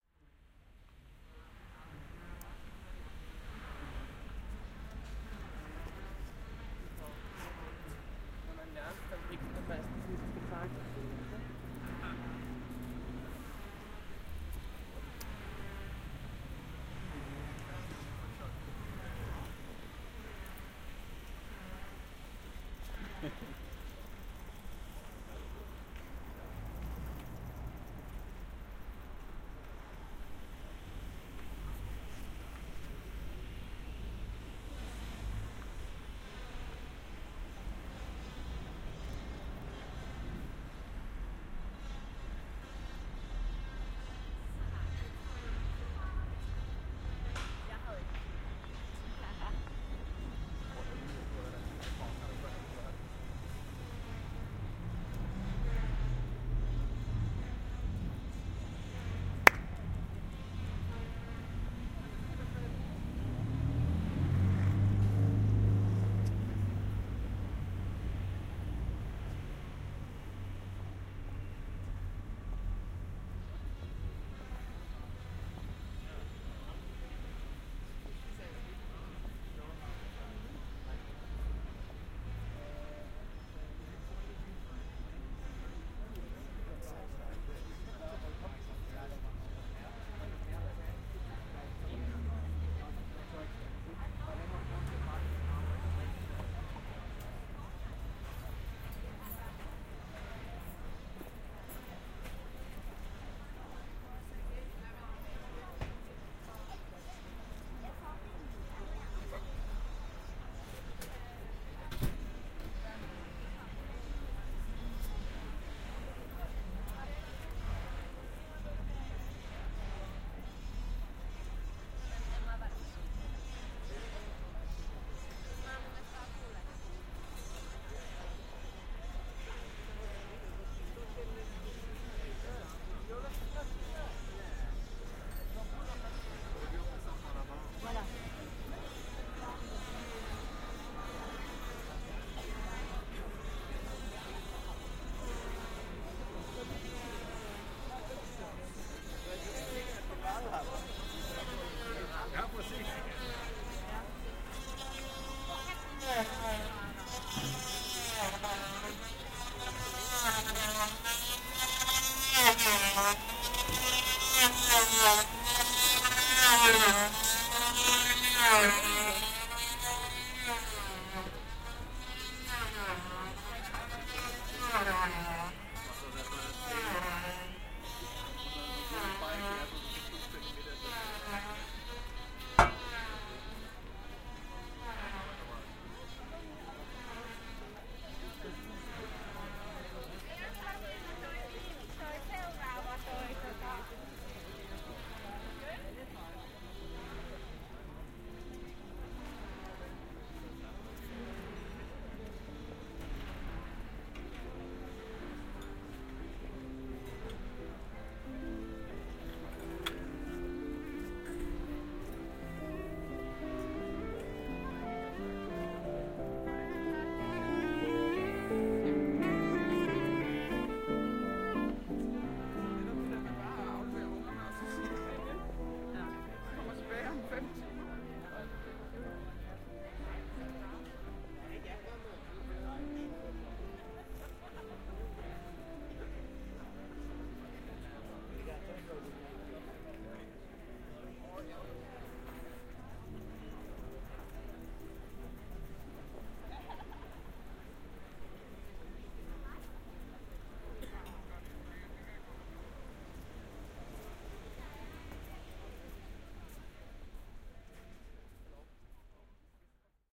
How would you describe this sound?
Soundwalk - Nyhavn, Copenhagen (Denmark)
Binaural Soundwalk in Nyhavn, Copenhagen.
I've recorded some video as well.
Copenhagen, Denmark, Nyhavn, Soundwalk